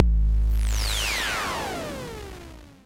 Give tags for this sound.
tr-8
symetrix-501
bassdrum
metasonix-f1
tube
future-retro-xs

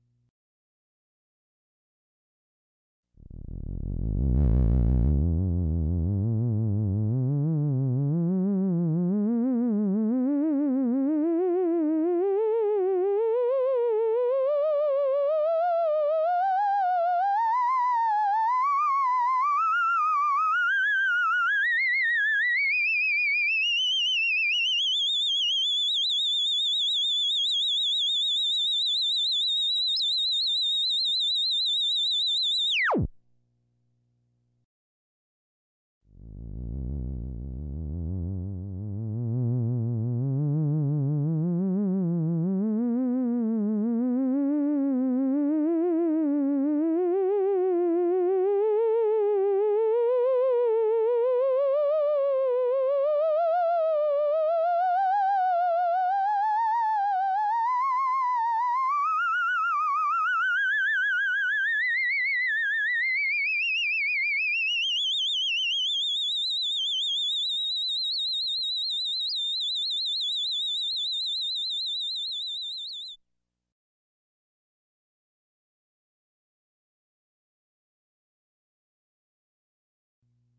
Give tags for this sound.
theremin-alien-floating
hypnotic-theremin
eerie-theremin

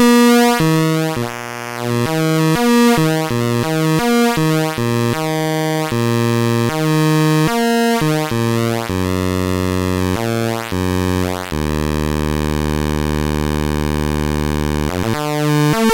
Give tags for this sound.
APC
Atari-Punk-Console
diy
drone
glitch
Lo-Fi
noise

